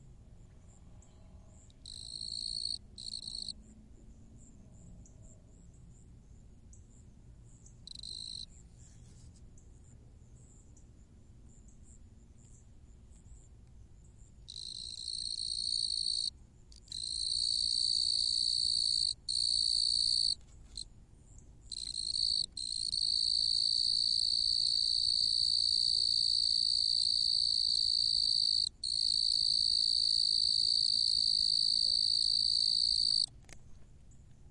Cricket song 002
Equipment: Tascam DR-03 on-board mics
A close-up, clean cricket sample recorded in the evening.
bug, cheep, chirp, cricket, entomos, insect, night, song